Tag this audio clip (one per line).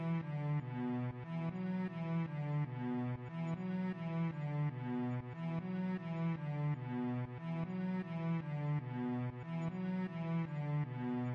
DELICE
Walk-D
WALKING